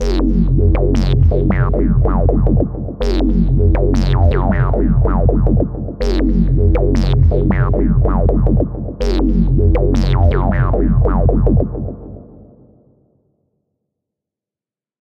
Dark, acidic drum & bass bassline variations with beats at 160BPM